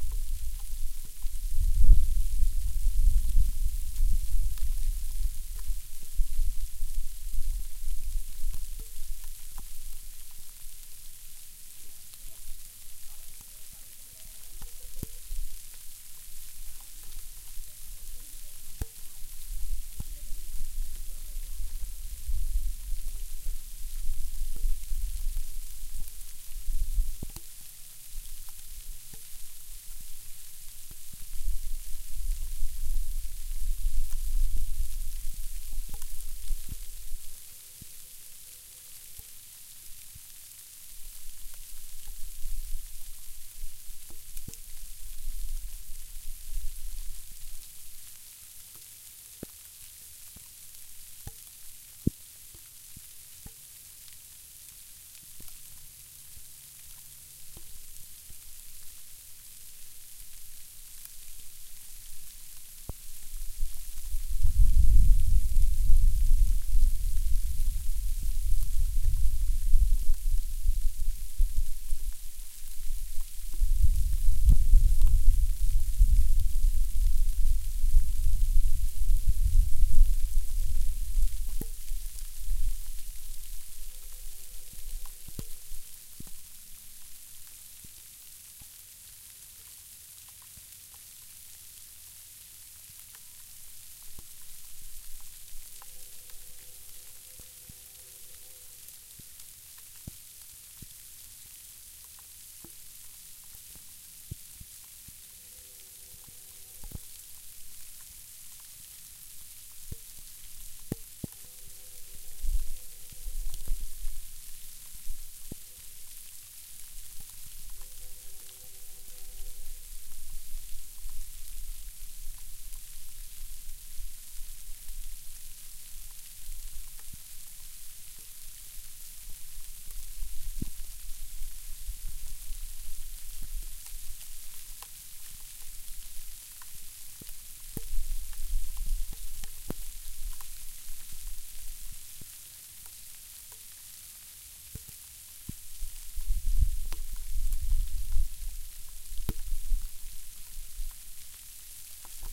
Light hail in Durham, NC
hail, winter